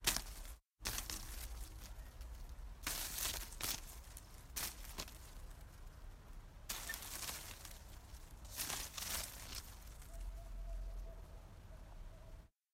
Foley, Village, Hitting a Bushes 02
Bush, Day, Dry, Hit, Hitting, Leaves, Natural, Nature, Summer, Trees, Wind